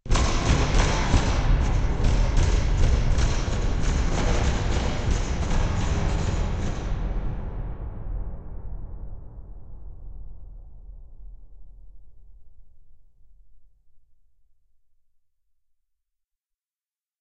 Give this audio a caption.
Piano falling down the stairs
Sharp thuds followed by various harmonic nuances - settles to silence after sustained reverb. This is a heavily processed sample that was constructed from the digital input of my Ibanez TCY10 acoustic guitar running directly into my computer via an Audigy2ZS device.